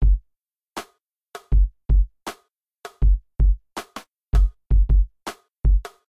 Cuppy 80bpm
Again, another simple drum loop you can use for your projects.
trap, noise, lo-fi, artificial, lofi, electronic